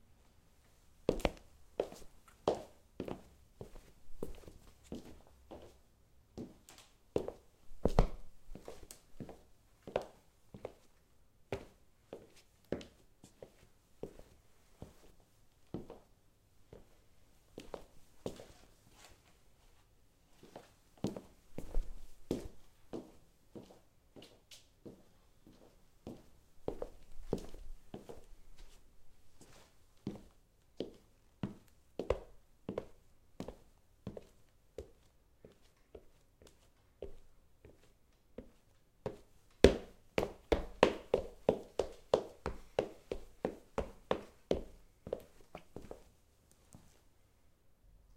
Footsteps on wooden flooring
Close-mic recording of footsteps on laminate flooring, indoors. Varying speed, distance, velocity and style of steps involved. Recorded with Rode M3.
Footsteps, close-mic, floor, foot, indoors, jogging, laminate, running, steps, wood, wooden